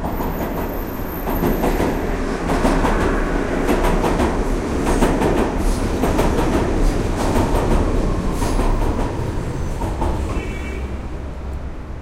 City Subway Pass Train
by, city, field-recording, new-york, nyc, passing, station, subway, train, underground